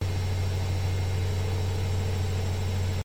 washing machine D (monaural) - Spin 6
washing-machine, high-quality